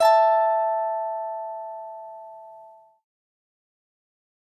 guitar tones 004 string G 21 tone E5+5
This is one note from my virtual instrument. The virtual instrument is made from a cheap Chinese stratocaster. Harmonizer effect with harmony +5 is added
electric, fender, guitar, instrument, notes, samples, simple, simplesamples, stratocaster, string, strings, virtual, virtualinstrument